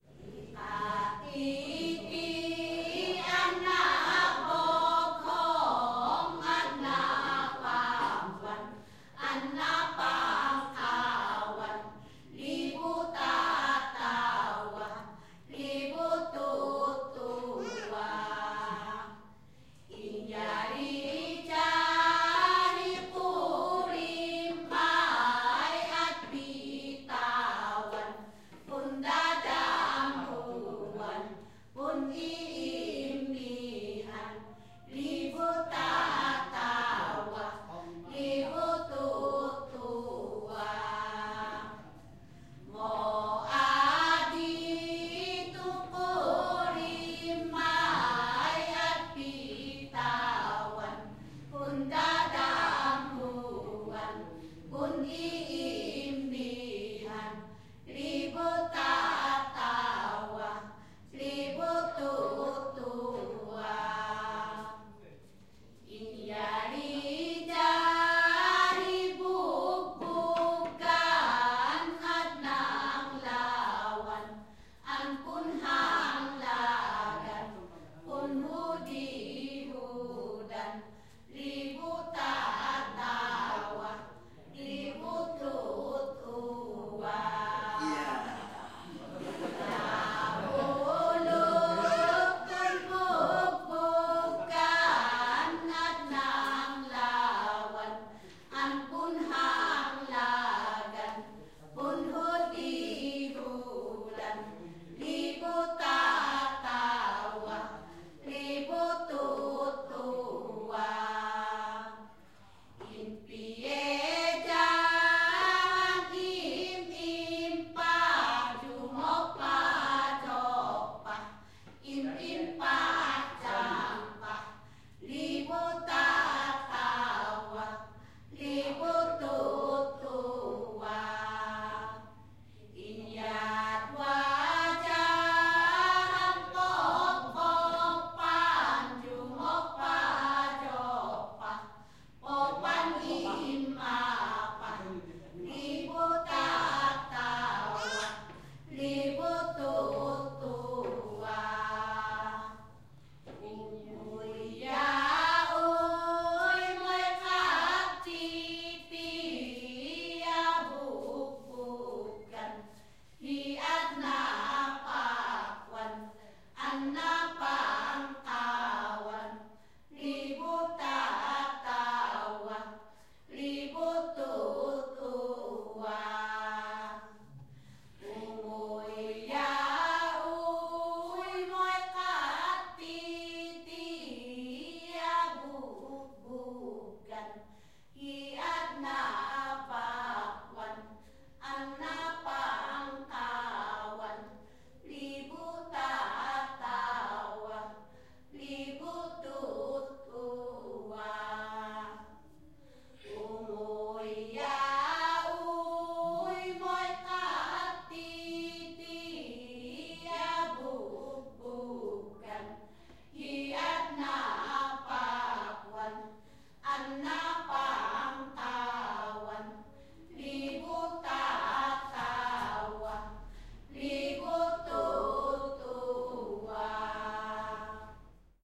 VOC 150310-0960 PH EthnicMusic
Traditional music and dance performed by native people from Banaue (Philippines).
Recorded in March 2015 in Banaue (famous place for its beautiful rice terraces in Philippines).
Recorder : Olympus LS-100 (internal microphones)
Philippines, ethnic, drums, Batad, instruments, drum, language, field-recording, tribal, song, Ifugao, traditional, tribe, Banaue, percussions, gong, music, dance, gongs, native